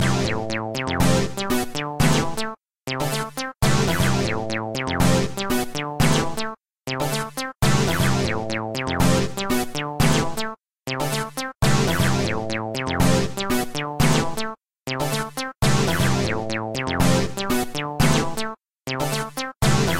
Short psicodelic piece of music